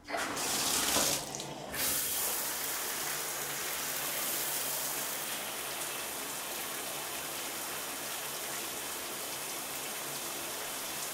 turning on shower